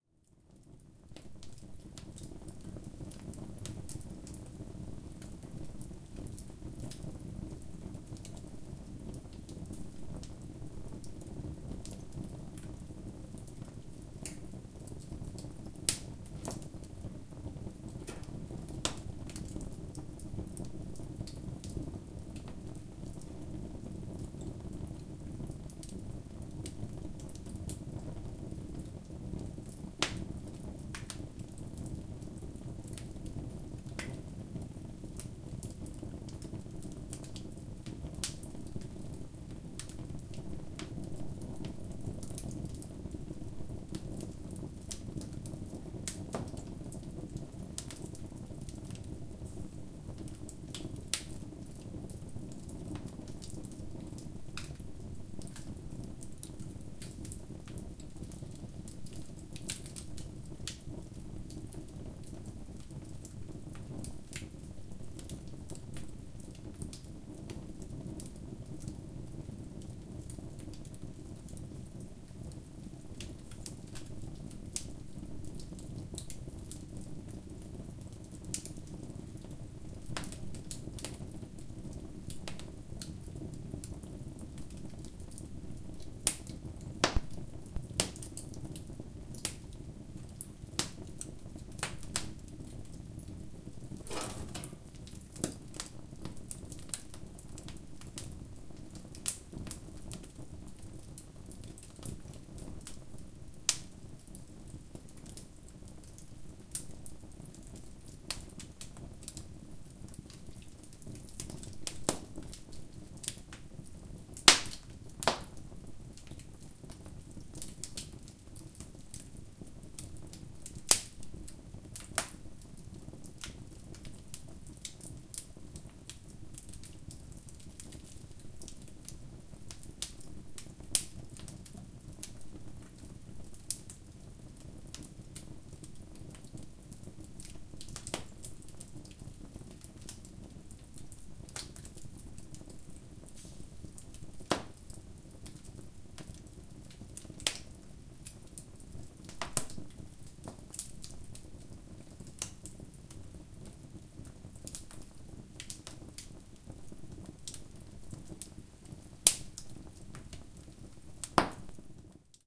Fire sparking in chimney with olive tree wood.
Fuego chispeando en chimenea con madera de olivo.
Recorder: Tascam DR 40 internal mics